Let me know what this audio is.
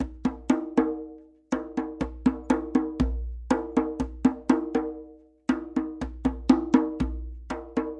djembe grooves kassa2 120bpm
This is a basic Kassarhythm I played on my djembe. Recorded at my home.
africa, ghana, percussion